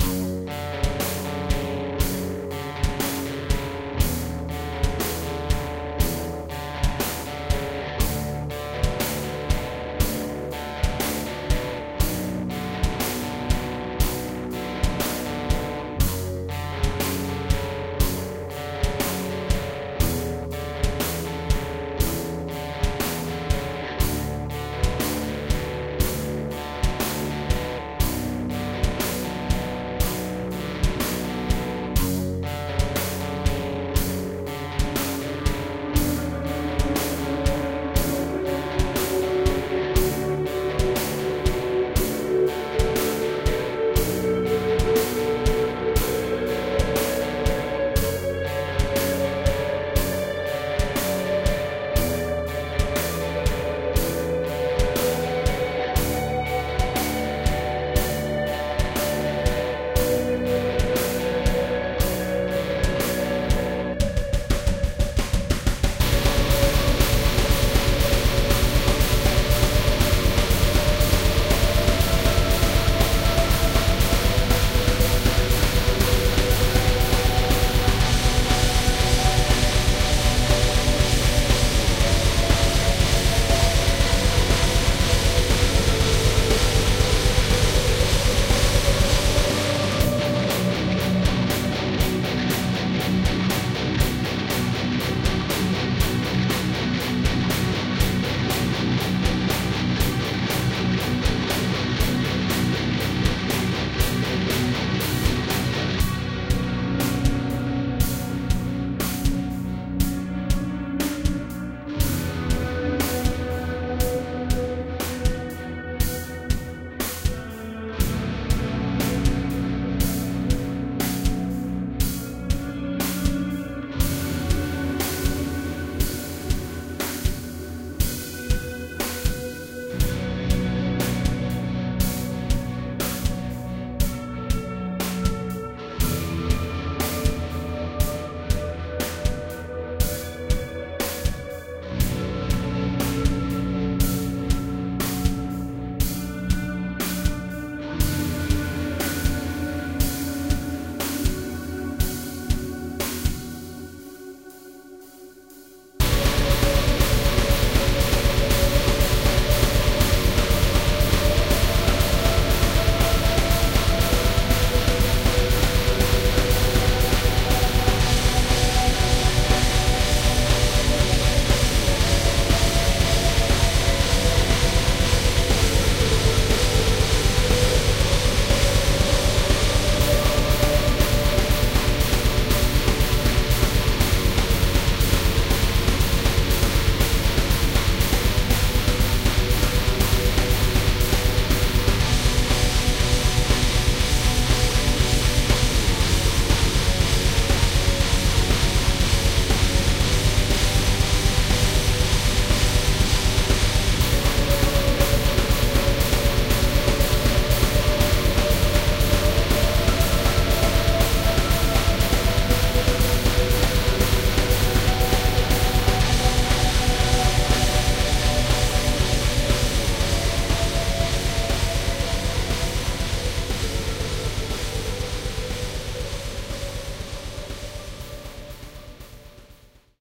Melodic Metal with Reverb Lead
Guitars bass drums
melodic, bass, drums